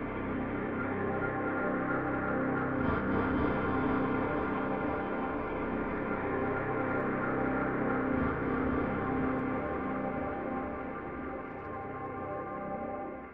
Vibrant pads and drones in this ambient texture.Ambient texture. 90 bpm 4/4. Duration: 5 bars.